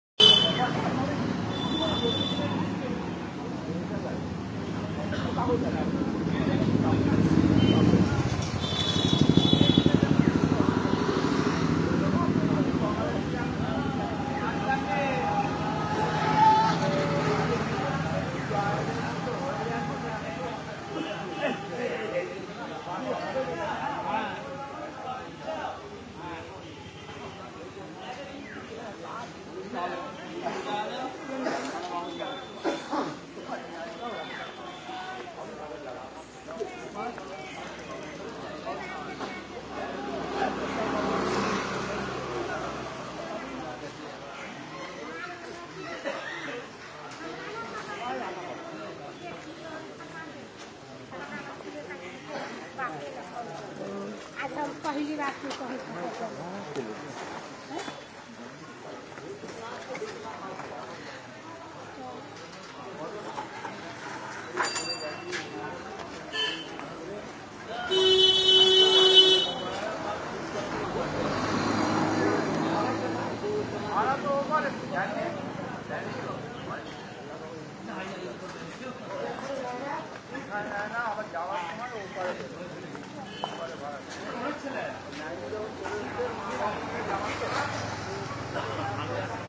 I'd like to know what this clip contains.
I record the street noise with people talking in street side, car bikes are moving.